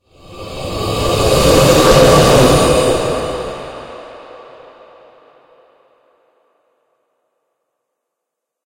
From my horror game "Black Rose". Ghost (Sullivan James) letting out a loud moan. This clip is heard during chases every time he's about to rush you.